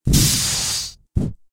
fire down - recorded using Earthworks QTC30 and LiquidPre